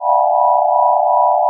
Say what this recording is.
Random Sound created with SuperCollider. Reminds me of sounds in ComputerGames or SciFi-Films, opening doors, beaming something...
ambience, ambient, atmosphere, electronic, sci-fi, sound, supercollider